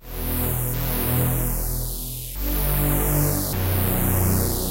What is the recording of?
biggish saw synth e e g b 102 bpm-01
biggish saw synth d a b e 198 bpm
acid, synth, electro, house, wave, bass, dub-step, rave, dance, trance, electronic, saw, techno, loop, club